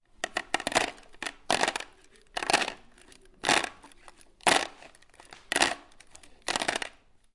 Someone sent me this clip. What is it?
mySound MES Ramvir
mySound, Spain, Barcelona, Mediterrania